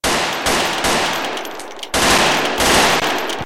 M16A2 firing 3 single rounds, 2x burst
M16A2 rifing firing 3 single rounds, switches mode to burst and fires 2 bursts. Moderate echo.
gun, field-recording, burst, rifle, M16A2, gun-shots